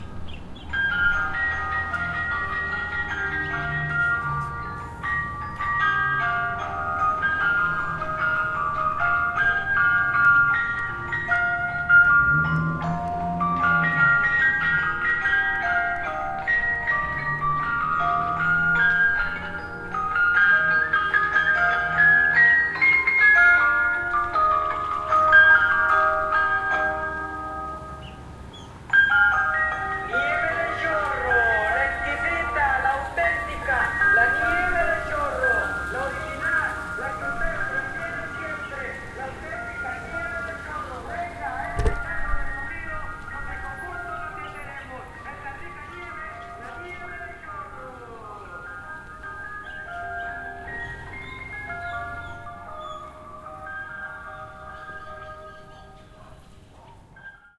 ice cream truck in Hermosillo Sonora México \ Carrito de las nieves\ Helados